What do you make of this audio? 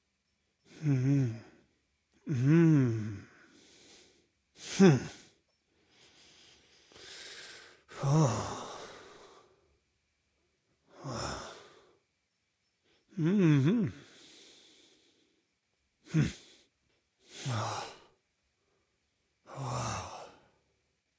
AS012949 admiration
voice of user AS012949
admiration, respect, wordless, esteem, human, favor, male, vocal, man, regard, voice